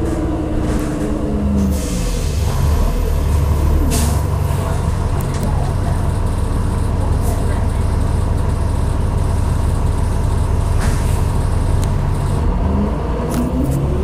Recorded during a 12 hour work day. Pressing the built in microphone as flush as possible against various surfaces on the bus.
bus, transportation, public, field-recording